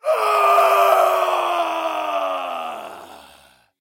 Studio roar
Me roaring / screaming for a song effect. Recorded with a Røde K-2 microphone in a professional studio.